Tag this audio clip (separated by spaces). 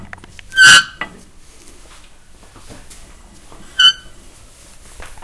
Sint-Laurens Snap